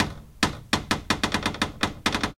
Grince Arm LoStaccs 2b
a cupboard creaking
creaking, door, cupboard, horror